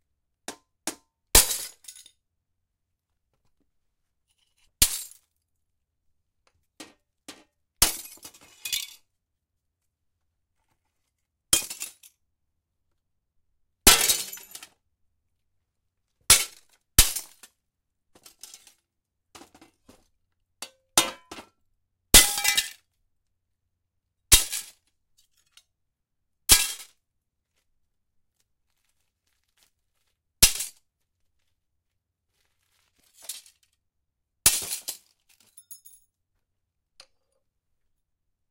breaking glass (multi)
Recorded with H4 in garage. Plate glass broken with hammer
break, crash, glass